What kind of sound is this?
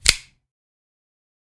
Knife Snickt
A dry recording of a spring assist blade opening.
crack, knife, opening, snap, snickt, swtichblade